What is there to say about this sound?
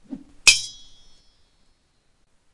Swords Clash w/ swing 1
Ting, Weapon, Medieval, Swing, Sword, Hit, Knight, Clash